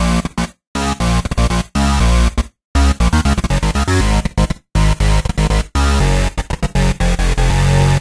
Well... No better name is given for now.
It's a custom made bass lead, nothing biggie :)
bass, bassy, big, dupstep, EQ, house, lead, massive, sequence, tracker, trance